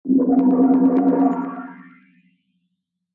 Teleport sound
Jammed notes played at once with different pitches
Recorded and processed with audacity
fx, pad, drone, sound, start-up, suspance, transformers, effect, texture, soundesign, Teleport, abstract, background